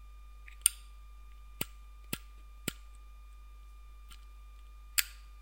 lighting me original zippo lighter